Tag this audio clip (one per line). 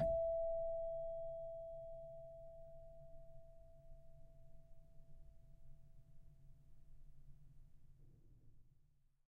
celeste
samples